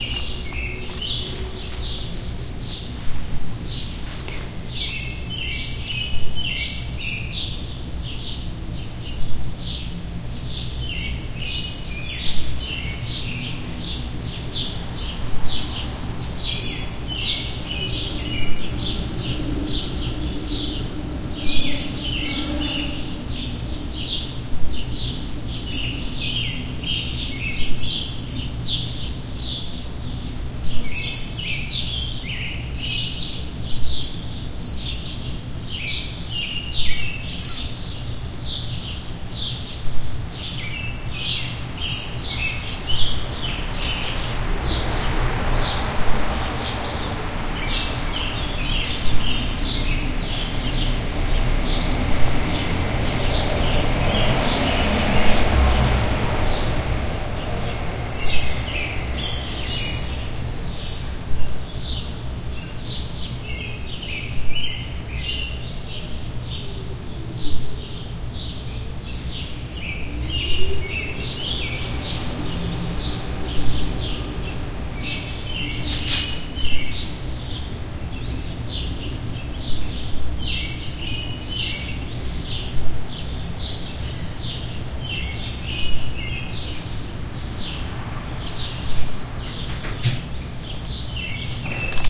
Stereo binaural recording of a quiet city neighbourhood, birds singing just before dawn. A bit of traffic.
birds, city, field-recording, morning